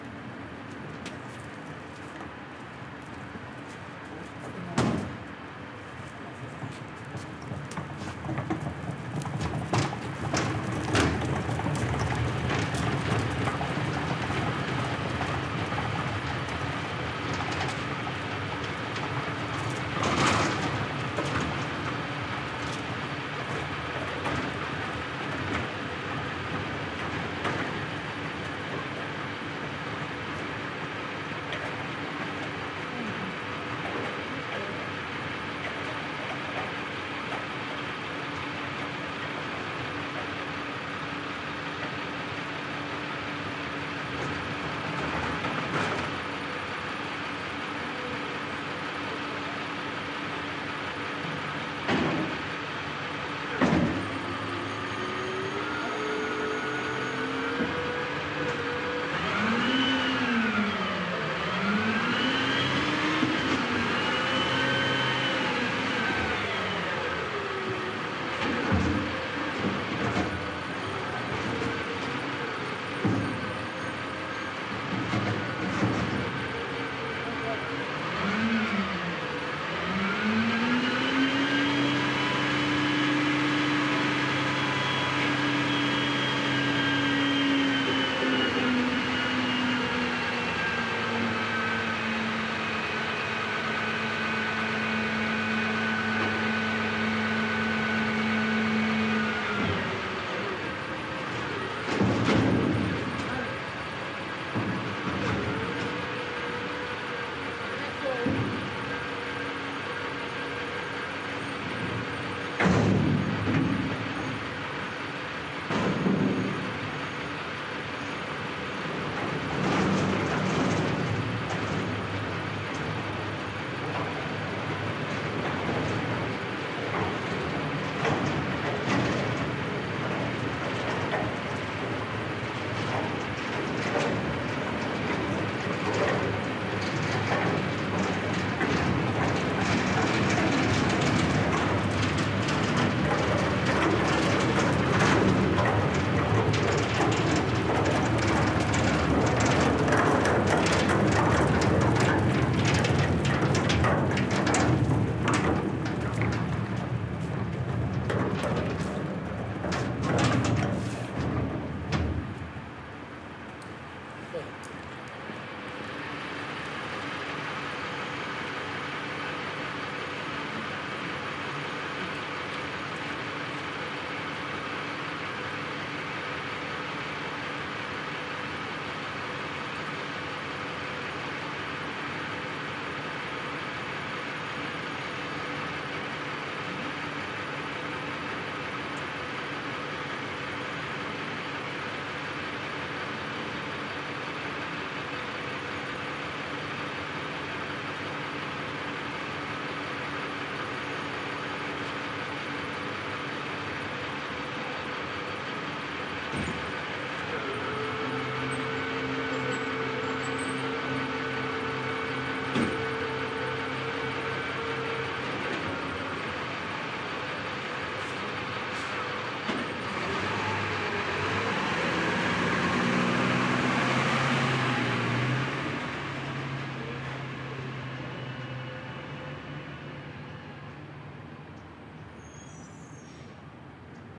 AMB M Garbage Collectors Wide
This is a recording of two men hauling our dumpster to the truck and emptying it. I stood stationary while they did their work. I've recorded them before, while walking with the dumpster. You can find that sound here too, if you want it.
Recorded with: Sanken CS-1e, Sound Devices 702t
cart, dumpster, garbage, metal, reverb, trash, truck, voices, wheels, work